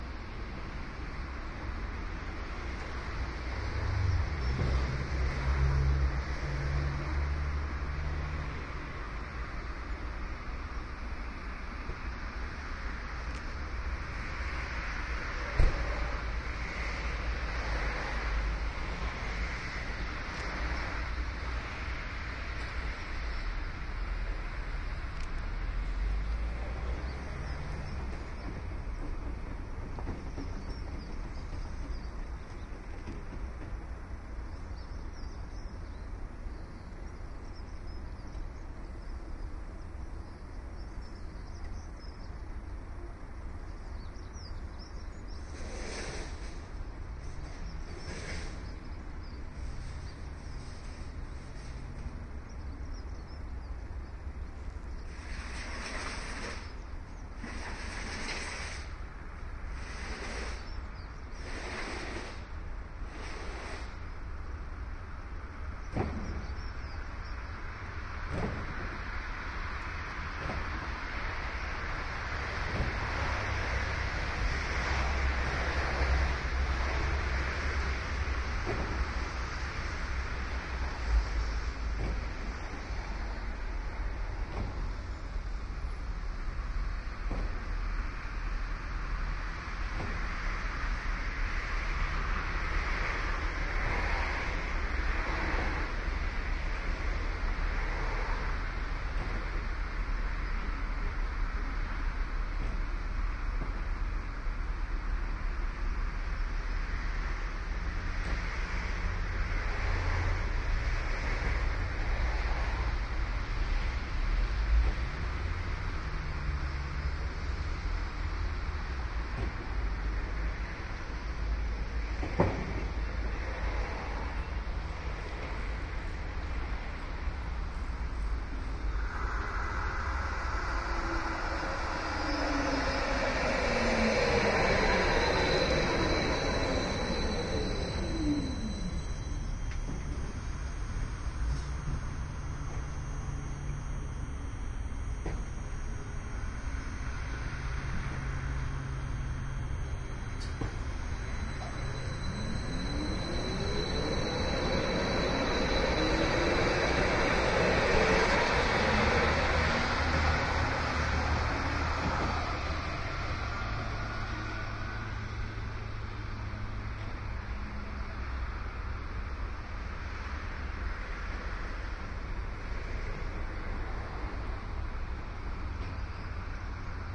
Waiting at the Pressehaus tramstation
Start of the journey on this day. Me waiting at a deserted tramstop in Hannover / Germany. A tram stopped on the other side. Later I travelled not only by tram, but also by bus. Both recordings you can listen to. AEVOX IE microphone and iRiver IHP-120.
binauarl, field-recording, road, street, traffic, tram, tramway